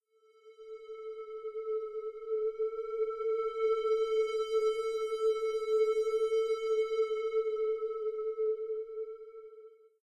COLIN Nina 2014 2015 psycho
Synthetic sound "Pluck" of 00:10 seconds.
I wanted different ambiences and variations in a short time, a sound which says: "Something will happen next", like with horror movies.
So I applied different effects to obtain this sound:
Adjustable fade: fade up Linear in- Linear out
Amplification 18,4
Reflection
Noise reduction
Wahwah
Cross fade out
Cross fade in
Paulstretch
action, ambience, murder, Suspenseful, thriller